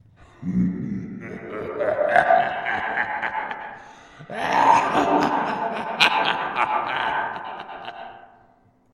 Darklords laugh
The taunting laugh of a dark lord.
Inspired by Ganondorf
Dark, evil, lord, sinister, villain, laughter